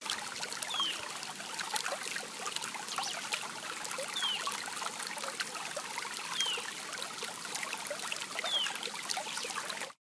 A recording from my iPhone of a River in the Cordoba´s Sierras in Argentina, you can hear some birds to on the background.
Grabación realizada con mi Iphone de un rio de las Sierras en Argentina, se pueden escuchar algunos pájaros en el fondo.